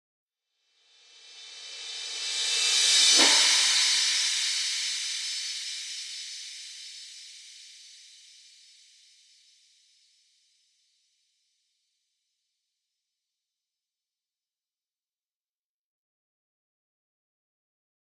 Reverse Cymbal
Digital Zero